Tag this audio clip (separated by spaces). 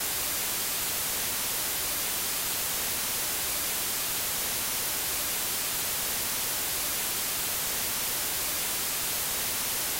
noise stereo